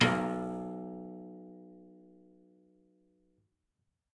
Tiny little piano bits of piano recordings